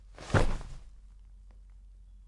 bag down 2
Can be used for body hits possibly.